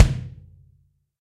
A low pitched, lo-fi, very fat sounding drum kit perfect for funk, hip-hop or experimental compositions.
drum, drums, fat, funky, lo-fi, phat, stereo